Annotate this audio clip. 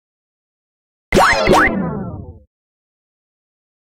power-down-01
06.22.16: Synthesized FX, generic for powering down, losing a life, or in anyway shutting off a machine.